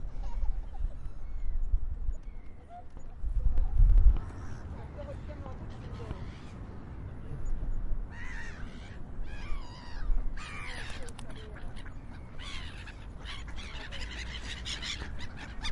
people with gulls near city river
people, river, gulls